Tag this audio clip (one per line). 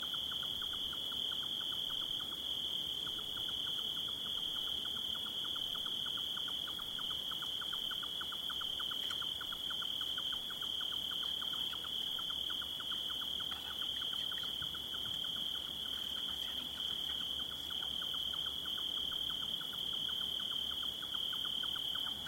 nature
donana
field-recording
summer
night
insect
crickets